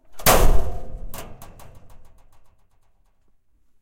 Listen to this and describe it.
Locker Slam 1
Slamming of a metal locker.
slam, metal, Locker